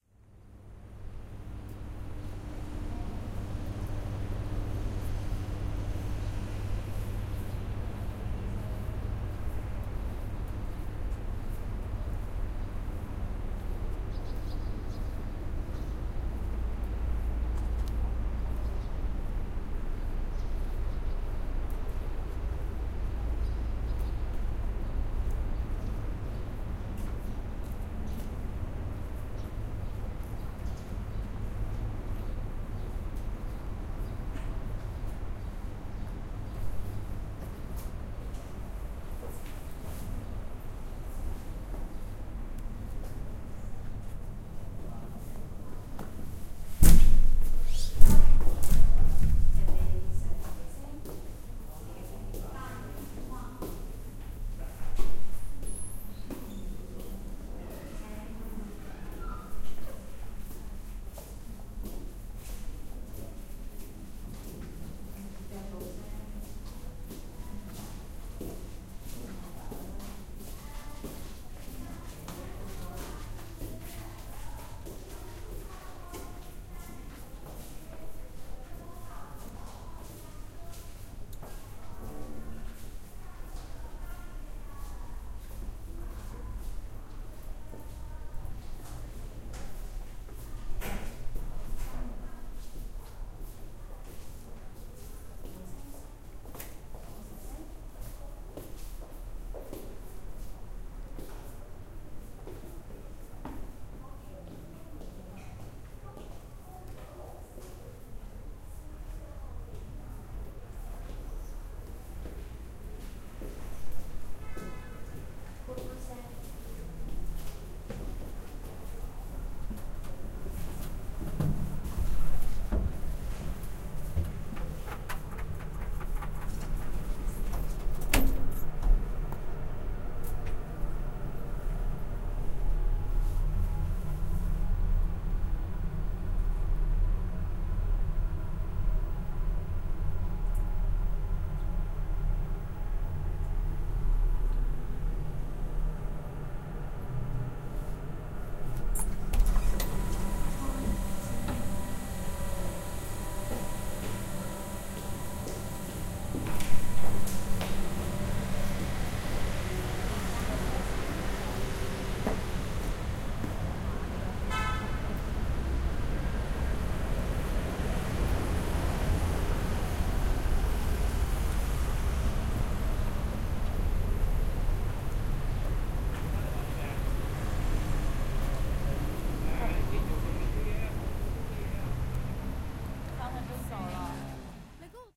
Group B F-FieldRec
Field Recording for the Digital Audio Recording and Production Systems class at the University of Saint Joseph - Macao, China.
The Students conducting the recording session were: Man, Ming Shuk Fan; Ip, Chibi Weng Si; Lai, Katy Chi Kei; U, Erik Chi Fong
field-recording, garden, macao, soundscape